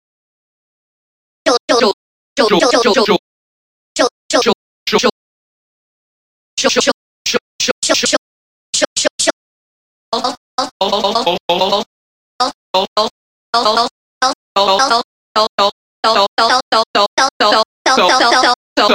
Experimentation with programs that i "Rediscovered". I didn't think these "New" programs were worthy of using, but to my surprise, they are actually extremely interesting to work with!
These are really some bizarre effects that were produced with the new programs.
///////////// Enjoy!
Unusual, Nonsense, Weird, Sci-fi